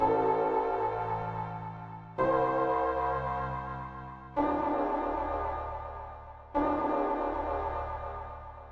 bassline synth 110bpm